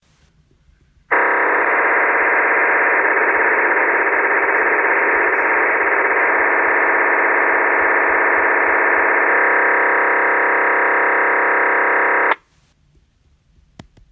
Yesterday I was on my radio scanner and while I was skaning for different channels, I picked up some of these transmissions which I've heard before. The sound of a ham radio transmission. If you have a scanner that scans police radios, ham radios or aircraft, you can also pick this transmission up if somebody plays that sound. Do you have a walkie-talkie, you might be able to receive this transmission if you're close to whoever's doing it.